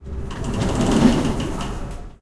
automatic elevator door
Automatic-door, campus-upf, close, mechanic, open